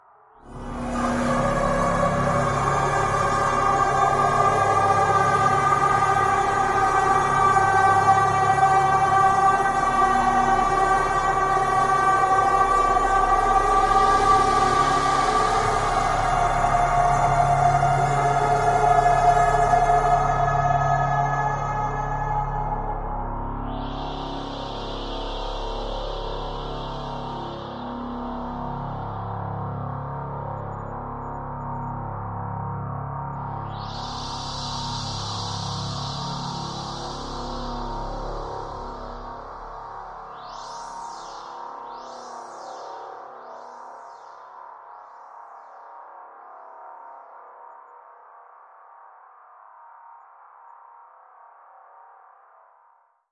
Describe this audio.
LAYERS 007 - Overtone Forest - C#2
LAYERS 007 - Overtone Forest is an extensive multisample package containing 97 samples covering C0 till C8. The key name is included in the sample name. The sound of Overtone Forest is already in the name: an ambient drone pad with some interesting overtones and harmonies that can be played as a PAD sound in your favourite sampler. It was created using NI Kontakt 3 as well as some soft synths (Karma Synth, Discovey Pro, D'cota) within Cubase and a lot of convolution (Voxengo's Pristine Space is my favourite).